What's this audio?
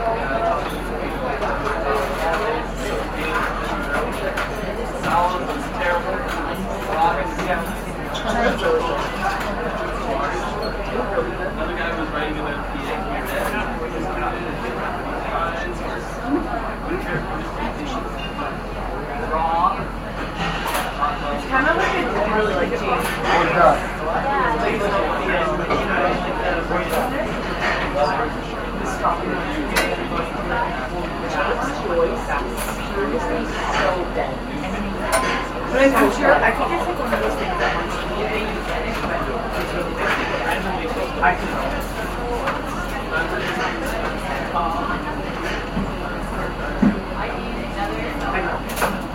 Mono field recording of a busy restaurant. People chatting in English and silverware clattering.
field-recording, restaurant, vocals, people